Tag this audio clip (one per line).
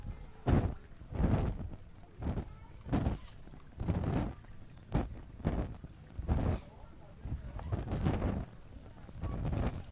field
recording